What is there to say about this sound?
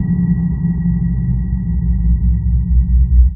Force Field 02
Force field or planetary ambience
Outer-Space, Planetary-Ambience, Science-Fiction, Sci-Fi, Force-Field